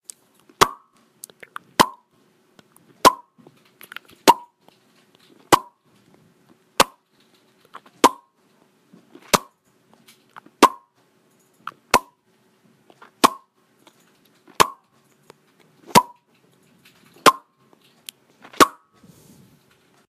The sound of lips popping/snappy. I wasn't really sure how else to describe it. Listen for yourself.